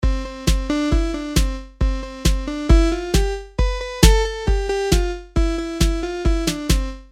EDM Dance
I made using Synth Chords with Electronic Beats and 135 BPM. I run through Chrome Music Lab in Song Maker